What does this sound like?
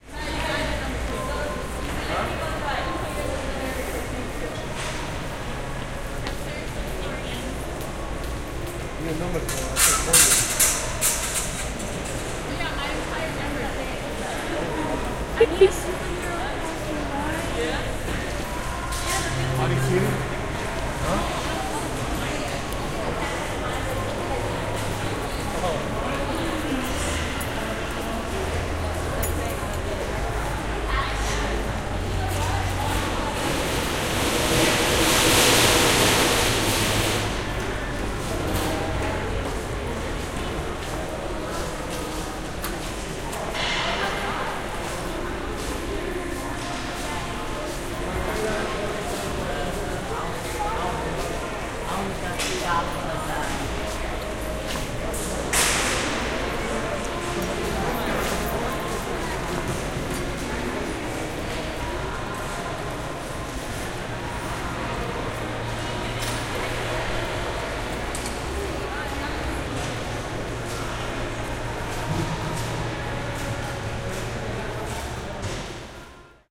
Walking through a shopping mall, in binaural audio. You can hear as I pass by people and shopping departments closing up shop. Some person goes beep beep for some reason at 15 seconds into the recording.
chatter, mall, ambiance, people, walking, spoken